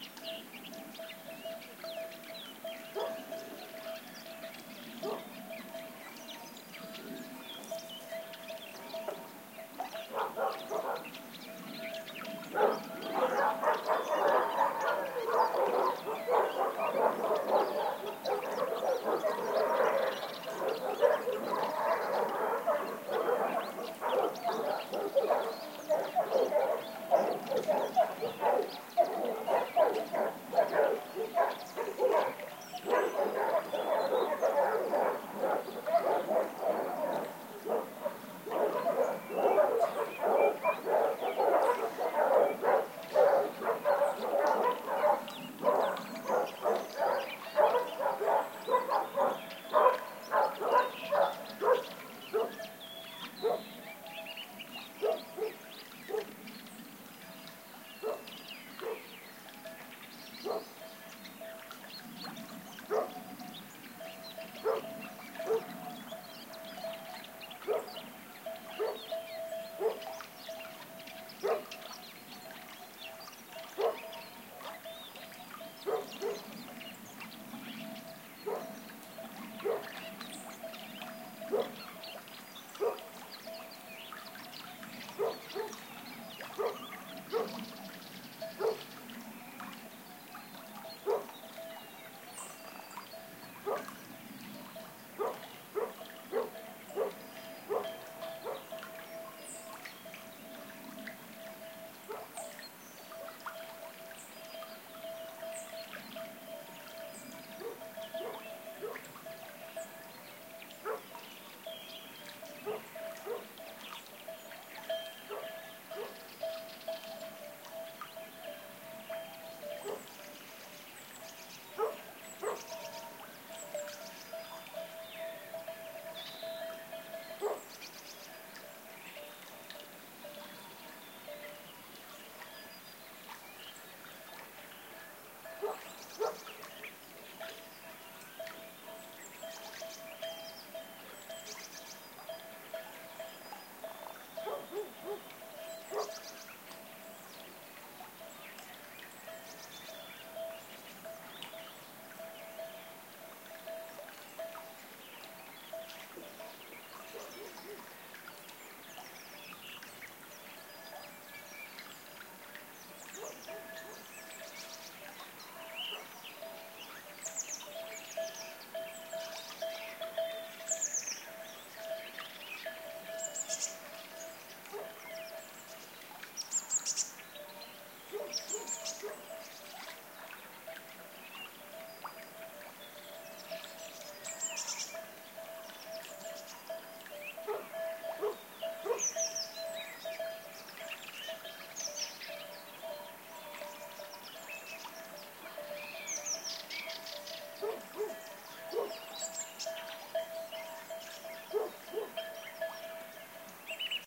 Countryside ambiance, with dogs barking, birds singing and sheepbells. Sennheiser MKH60 + MKH30 into Shure FP24 preamplifier, PCM M10 recorder. Decoded to Mid-side stereo with free Voxengo VST plugin
barking, ambiance, field-recording, dogs